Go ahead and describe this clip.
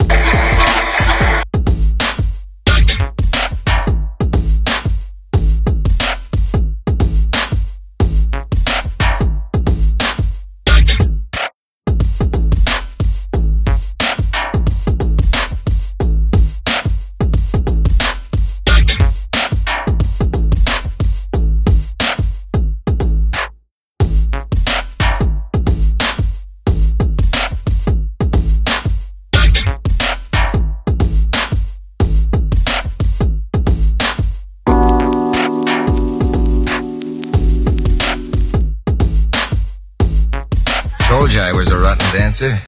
Rotten Dancer 90bpm 16 Bars
Beat loop with samples. He has not forgotten his dancing is rotten.
samples, mixes